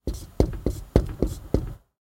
marker-whiteboard-short09
Writing on a whiteboard.
draw, drawing, dry, erase, expo, foley, marker, scribble, sound, whiteboard, write, writing